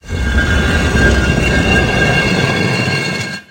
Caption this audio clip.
Door - Stone - Large - 001
A heavy stone door sound for something you might hear in a fantasy game. It may have been made using a toilet lid and other things...
door, earth, fantasy, gate, heavy, lid, rock, stone